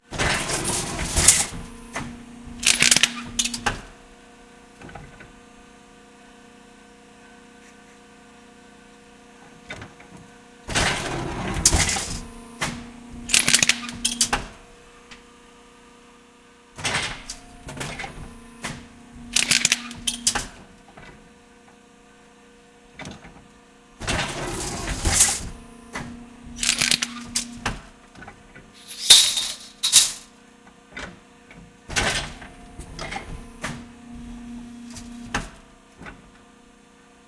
machine hydraulic metal cutter close crunch2
metal, hydraulic, close, crunch, machine, cutter